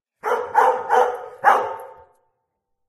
A dog is barking far away